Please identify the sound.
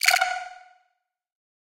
A snippet from my morning granular session, which I then cleaned and processed.
application, chat, computer, futuristic, game, grains, granular, interface, notification, sci-fi